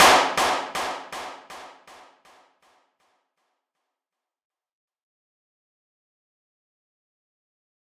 This is a record from our radio-station inside the rooms and we´ve recorded with a zoomH2.